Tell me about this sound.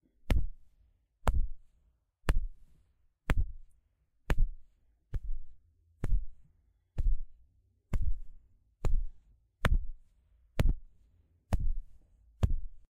Golpe Palo y Mano
Sonido de un palo contra una mano
Golpe, palo, pelea